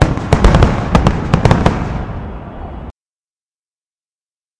multi low hits
recording of a multi firework explosions
distant, fireworks, ambience, fire